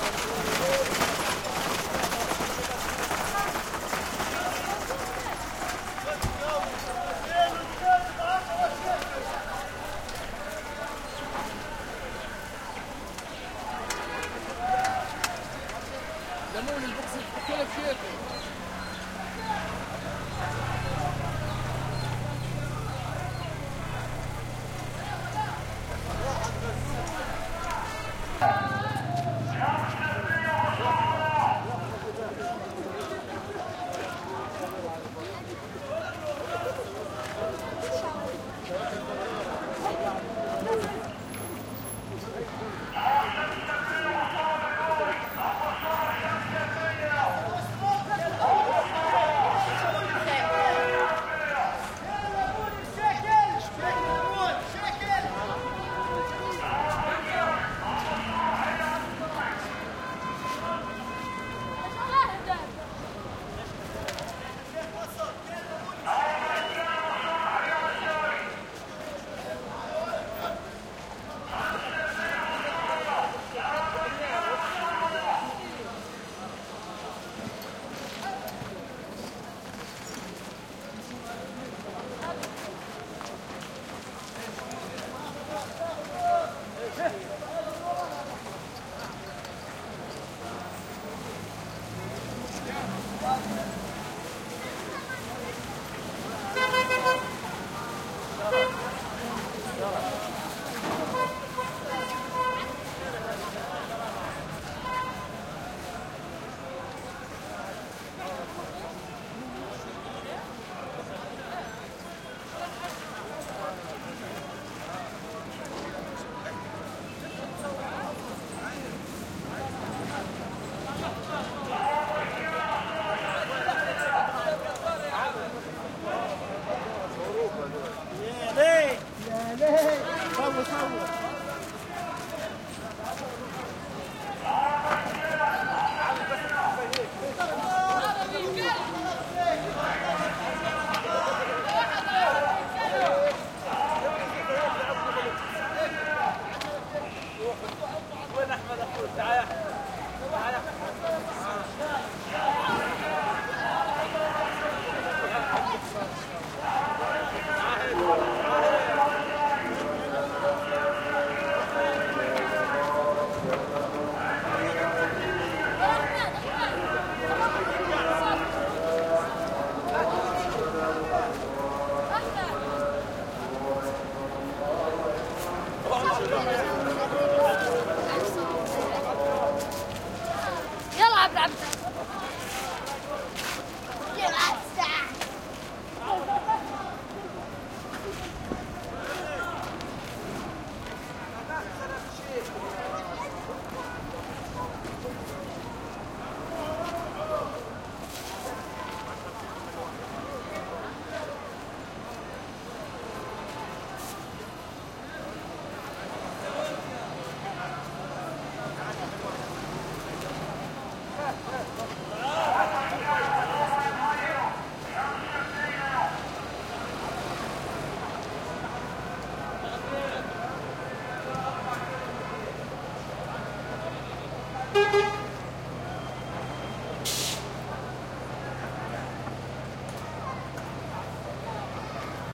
market ext Palestine arabic voices vendors throaty traffic good movement shopping carts pushed past orange vendor on PA sounds like prison guard Gaza 2016
market, traffic, vendors